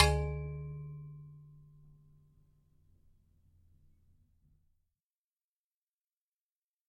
Bell-like resonance with few overtones
metal, ping, bell, field-recording, resonant, metallic, sword